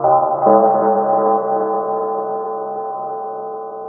amb klok 3
a dark bell sound
effect, ambient, industrial, bell